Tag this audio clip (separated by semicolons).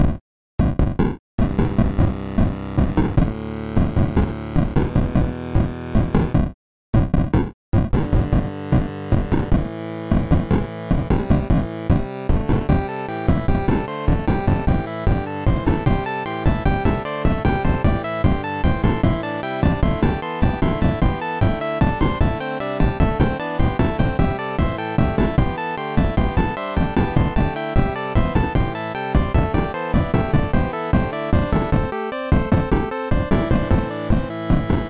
Music; Drums; Pixel